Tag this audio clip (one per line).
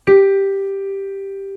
G
Piano
Sol